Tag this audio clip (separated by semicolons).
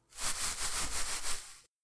leaf; leaves; rustle; rustling; shake; tree; wind